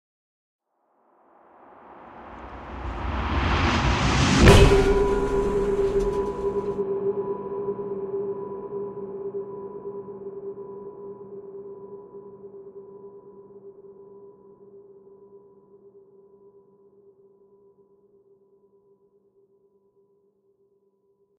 Title Bang ( Steel )
Used for title graphics.
I have decided to make this freely available under the
Created using Adobe Audition.
title, effects, special, title-graphics, intro, graphics, title-bang, clang, graphic-effects, special-effects, audio-effects, intro-title, metal, steel, bang, title-intro